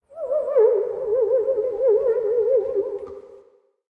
Classic Ghost Sound
Cartoon, Ghost, Halloween, Oldschool, Spooky